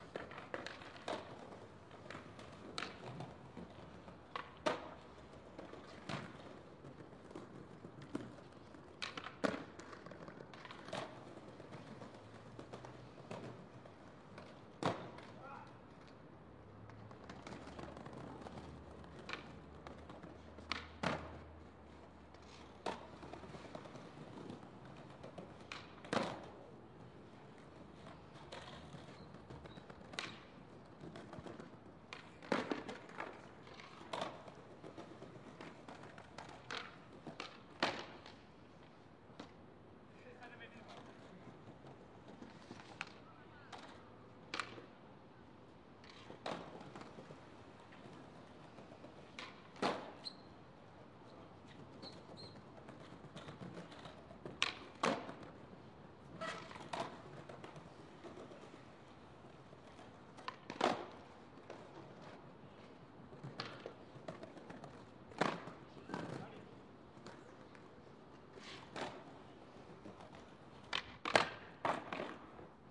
160718 HSN skateboarders 1
Two skateboarders very relaxed on early Saturday evening in Spanish Salamanca. Boards passing from one side to the other.